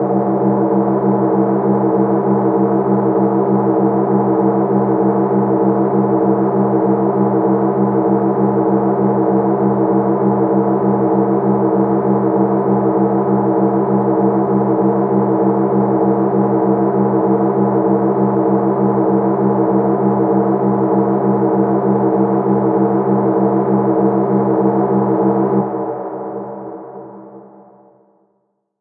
A thick sounding moody sound which has been sustained and run through a reverb unit in Logic.
atmosphere, drone, pad, Sustain